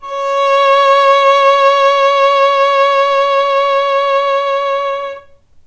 violin arco vib C#4
violin arco vibrato
vibrato, violin, arco